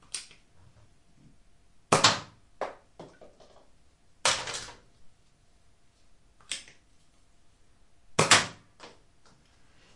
NERF PISTOL SHOOTING
Nerf N-Strike Elite Jolt getting charged and shot.
Recorded with Zoom H2. Edited with Audacity.
plaything, arm, weapon, blaster, toy, plastic-gun, plastic, shooting, foam, rifle, kids-toy, gun, pistol